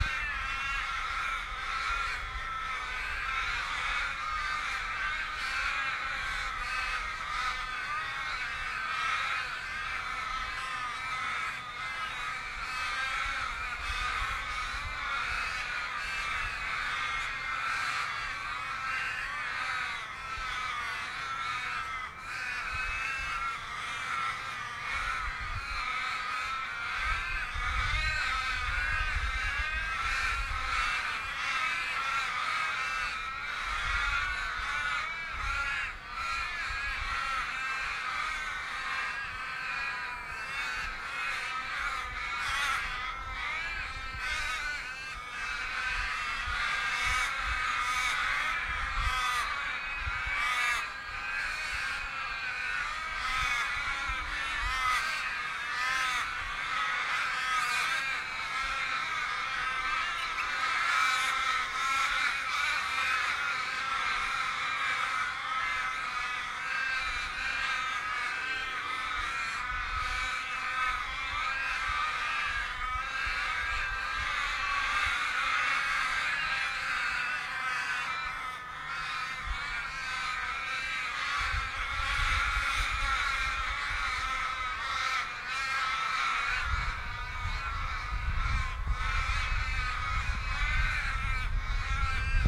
Murder Of Crows at Yellagonga
A loud and gregarious murder of crows! Recorded at Yellagonga Regional Park, Western Australia.
australia, birds, crows, field-recording, nature